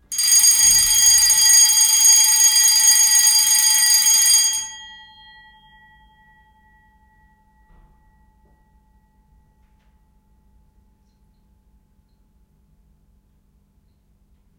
A fire alarm test I recorded with my RØDE NT-USB desktop microphone. The fire alarms plays and then you can hear the ringing after it is switched off.
emergency, bell, alert, fire, field-recording, fire-alarm, warning, test, alarm